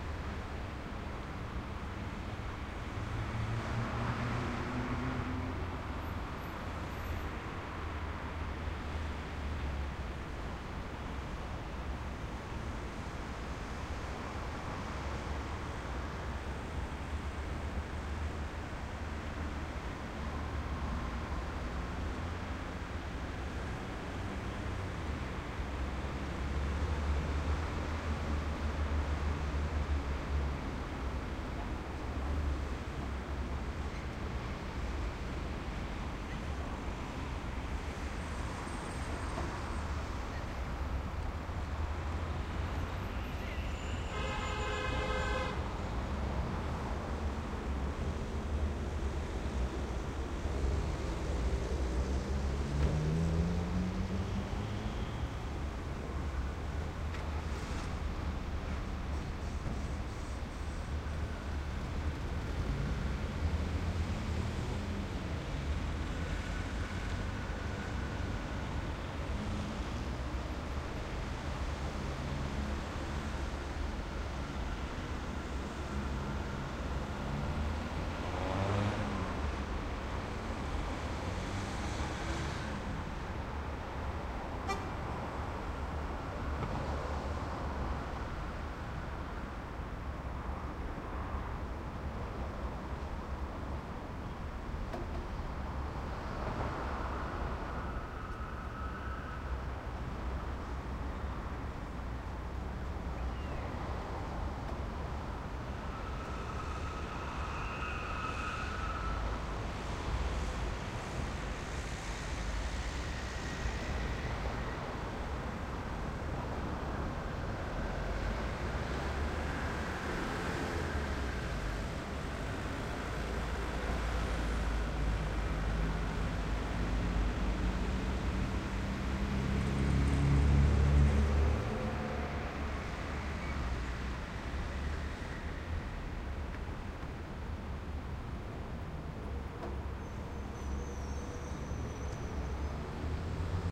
Tower Garage ruff--16
field recording from top of the garage in shopping centre
field, traffic